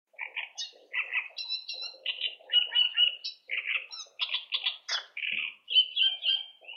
Recording of bird (reed warbler?) song processed with a noise limiter, giving a abstract bubbly synthesizer-like sound
song, filtered, bird